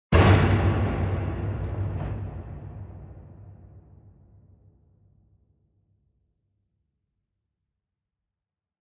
Metal impact
hitting metalplate in big hall
struck, enormous, hit, impact